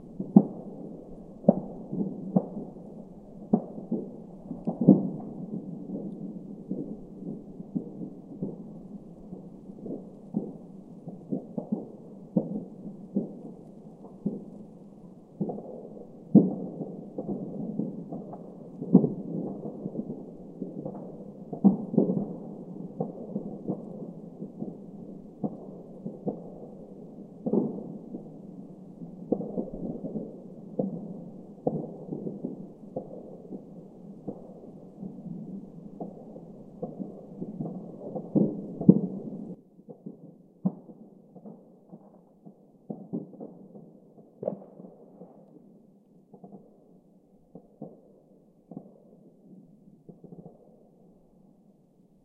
Some fireworks going on medium-often in the distance, recorded in stereo. I'm not sure if the quality is great, I mastered this recording and removed wind with poor headphones.